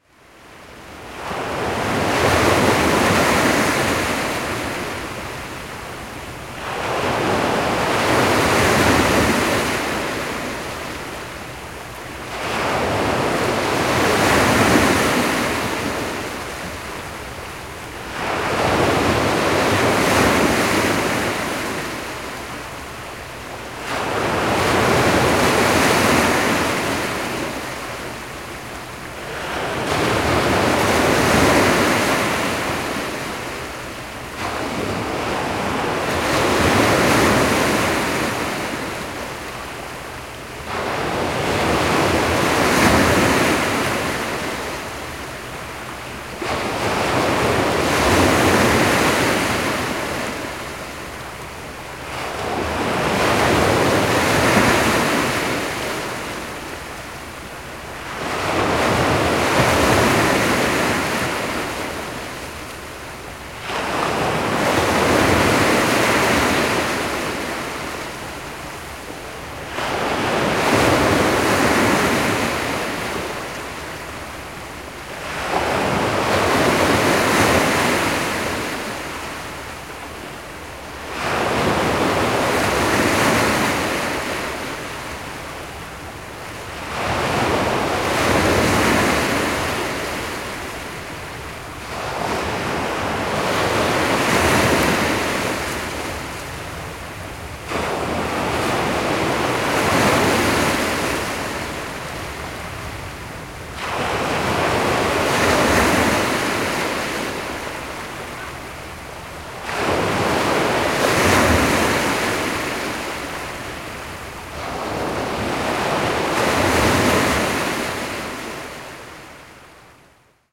Fieldrecording of waves on fjord from the
island of Bastoy.